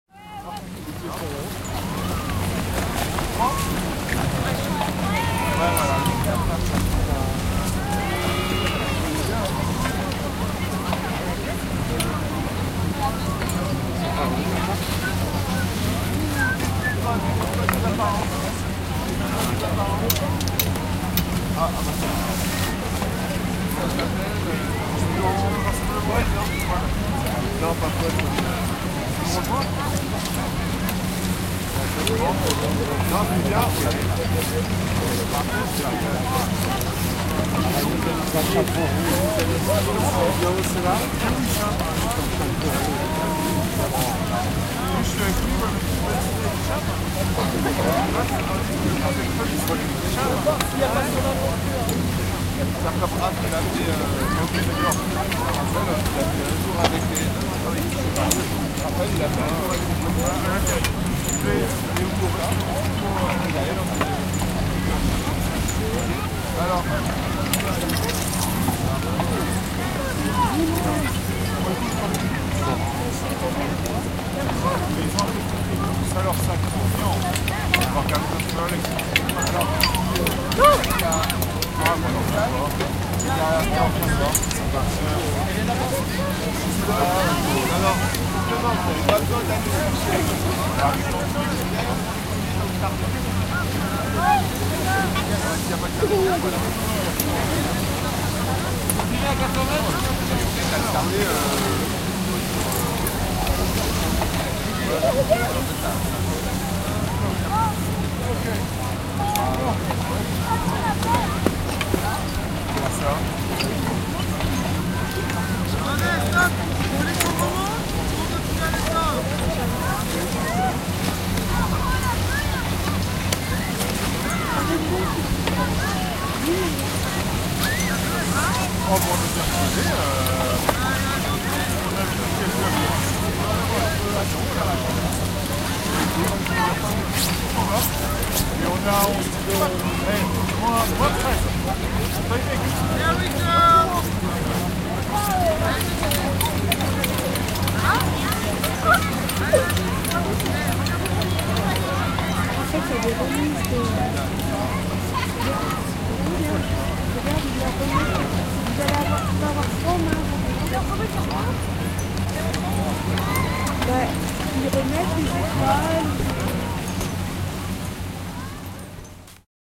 Ski resort-main kids gathering area
A field recording of the main gathering area of a french Alps ski resort. Peolpe chatting, people walking on snow, french language, kids, winter sports atmosphere. Recorded with a zoom H2 in X/Y stereo mode.